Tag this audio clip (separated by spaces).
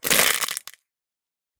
bug crack crackle crunch crush eggshell egg-shells grit quash smash smush squash squish